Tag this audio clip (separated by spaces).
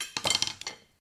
crash chaotic clatter